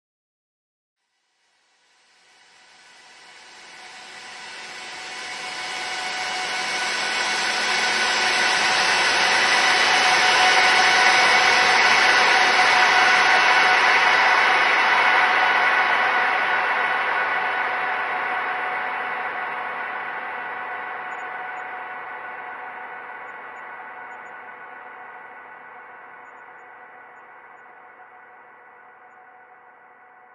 somehighnoise stretched with more reverb and filters and resonances and stuff.